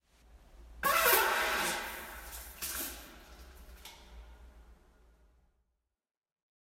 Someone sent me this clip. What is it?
Land Fart
Real farts with some natural reverb. Recorded with a fucked up iPhone 7 in a disgusting screwed up pub. As always I was dead drunk and farted away on the lovely toilets there.
disgusting, pub, fart, male, reverb, ambient, drunk, beer, flatulation, wow, fun, voice, human, stink